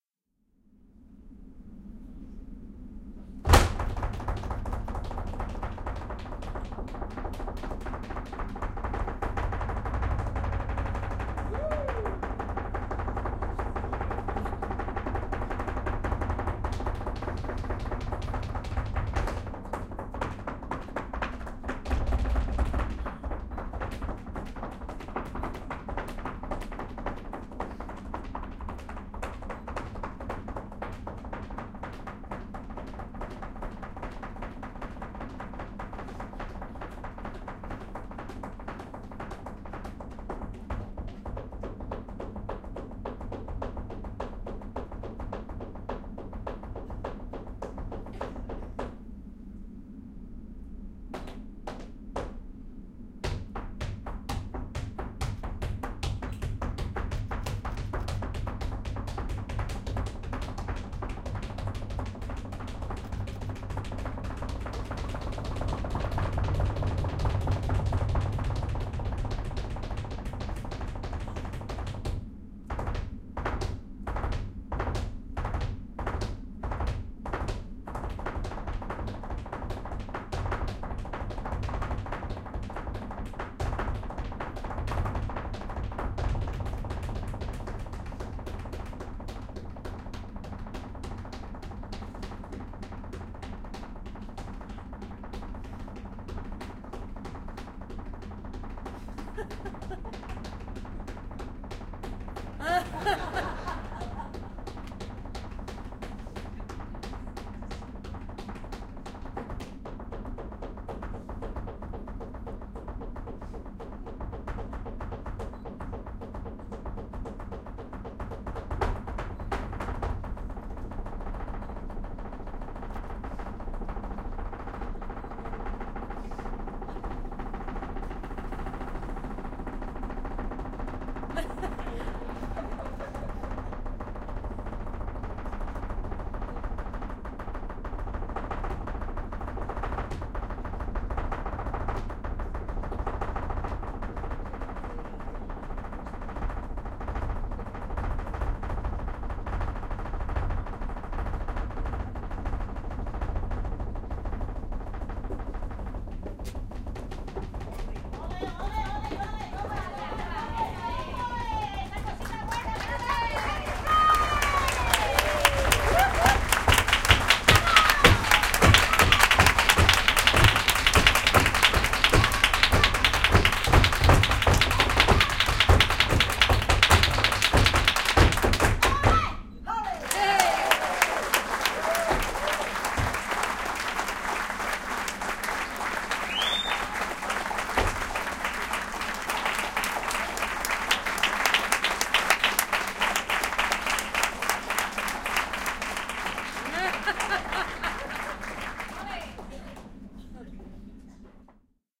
A Spanish male dancer dances a long tour de force of of rapid unaccompanied changing rhythms with applause and calls of 'olé' at the end.